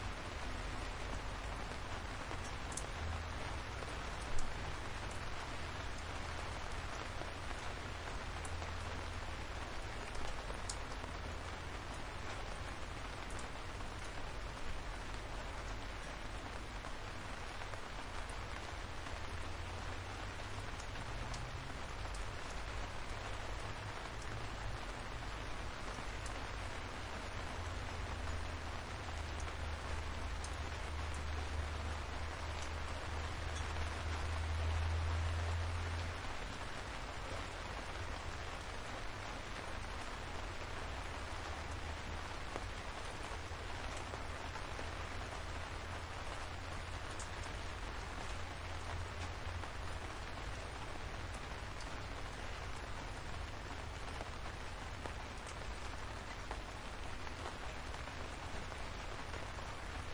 The rain falls against the parasol
The rain falls softly on the parasol with the microphones standing under it.
Mikrophones 2 OM1(line-audio)
Wind protect Röde WS8
drip, dripping, drops, rain, raindrops, raining, rainy, water, weather, wet